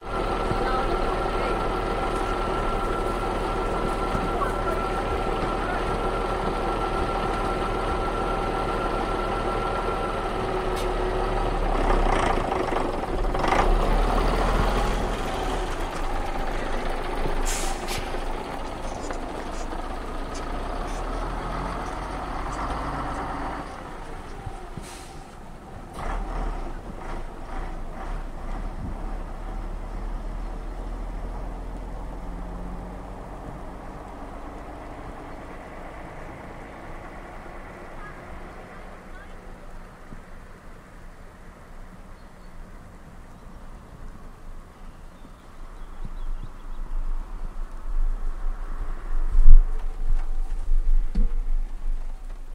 Bus starting engine and driving away.
motor, bus, track, engine